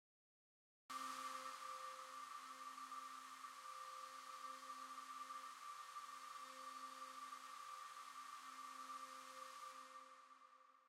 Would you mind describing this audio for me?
A small drone, or small robot, flying. Synthesized with VCV rack.
Flight, Robot, Synthetic, Engine, Drone, Flying